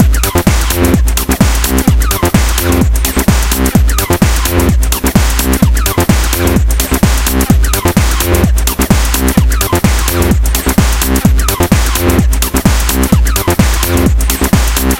This is a little electronic loop I made on Reason 4 and Sample "Walkerbelm essentials"
128-bpm, electro, hard, loop, melody, pattern, weird